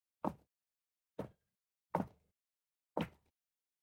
WoodType 1 Footstep MensDressShoe
Recorded with AKG-C414, cardioid.
Dress shoes (male) with heel, Wood unknown.
Cheers, Monte
steps, walking, ste, footstep, footsteps, foot, wood, step, Foley, walk